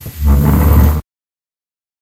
Sonido de arrastrar una silla
arrastrar, chair, silla